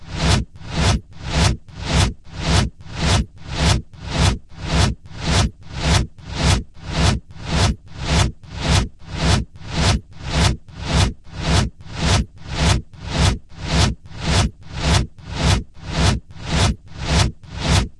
A basic beat made using Mixcraft 9.
loop, digital, percussion